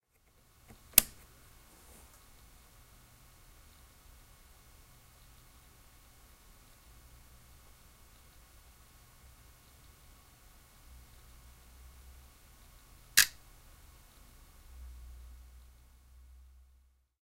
the sound of me switching on my MOTU traveler mkIII audio interface; the first click is switching on, the second is the sound of the unit activating.
audio-interface, click, electronics, field-recording, soundcard, switch